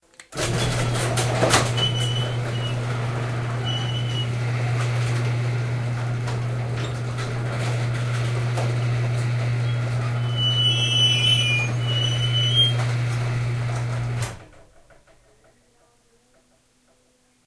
Squeaky Garage Door Close
Recorded with a black Sony IC voice recorder.
automatic, squeaky, garage, switch, push, old, button, creak, mechanical, airy, door, close